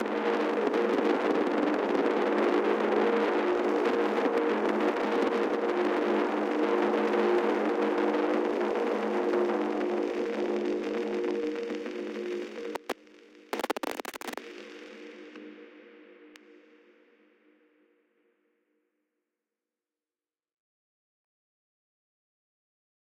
Distorted Elemnts 04
various recordings and soundfiles -> distorted -> ableton corpus -> amp
amp, corpus, distorted